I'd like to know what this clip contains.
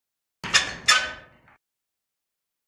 HINGE-SQUEAK
08.02.16: A quick squeak from a metal filing cabinet handle.
cabinet, handle, creaky, metal, close, door, squeaky, open, creak, squeak